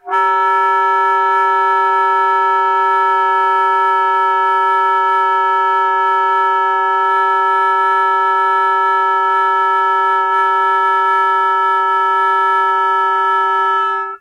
The number of file correspond to the numbering of the book:
Le sons multiples aux saxophones / Daniel Kientzy. - Paris : Editions Salabert,
[198?]. - (Salabert Enseignement : Nuovelles techniques instrumentales).
Setup: